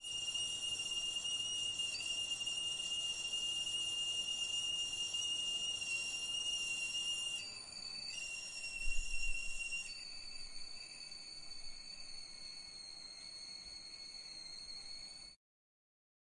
Whistling of Kettle
Sound effect of a boiled kettle whistling.
kettle, owi, sfx, whistle